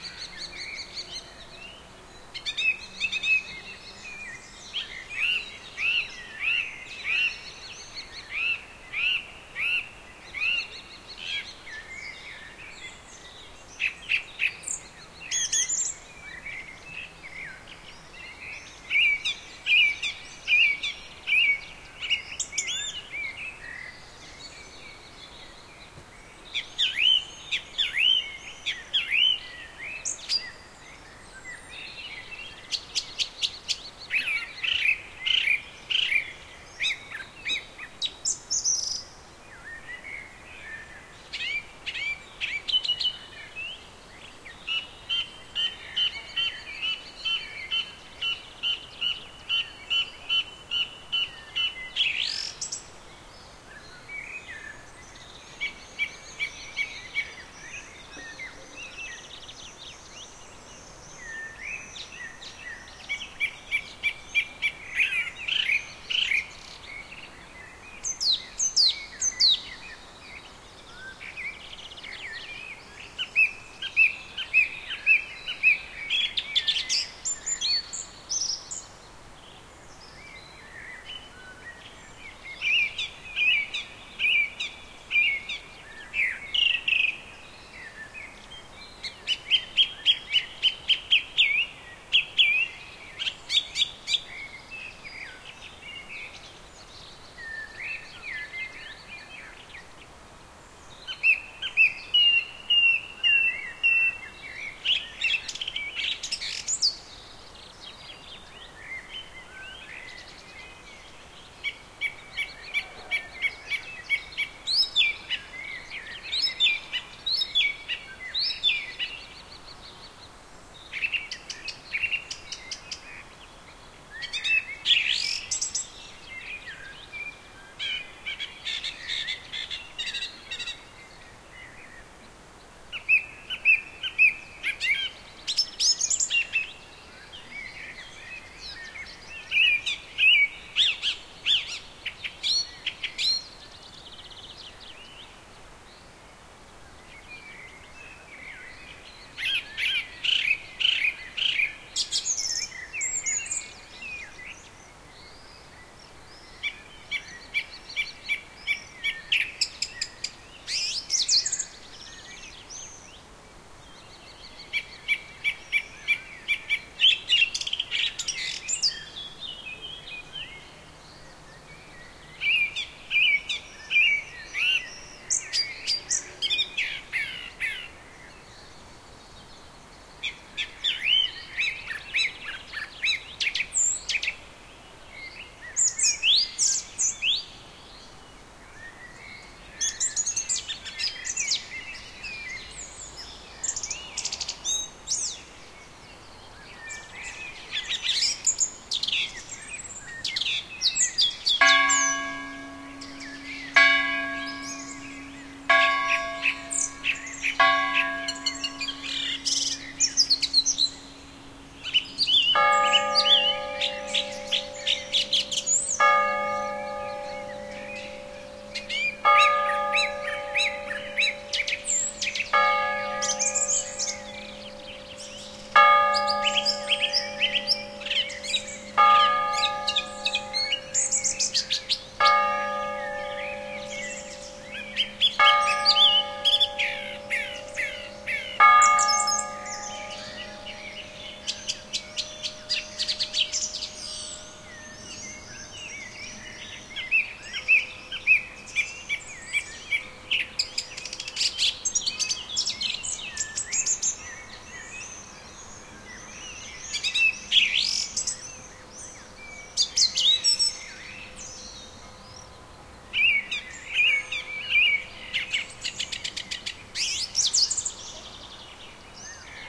140809 FrybgWb BirdTower Evening F
A summer evening in a vineyard by the German town of Freyburg on Unstrut.
The recording abounds with natural background noises (wind in trees, birds, insects). In the foreground, a little bird is singing, giving it all it has. I am no bird expert, but if somebody knows what species it is, I'd love to know.
At 3:22 into the recording, the tower bells of the keep of Neuenburg Castle, located on the hill opposite, toll 9 o'clock. They do this by tolling a high bell 4 times, once for each quarter of the full hour, followed by a lower bell tolling the hour 9 times.
The recorder is located on the top of the vineyard, facing across the valley between vineyard and castle.
These are the FRONT channels of a 4ch surround recording.
Recording conducted with a Zoom H2, mic's set to 90° dispersion.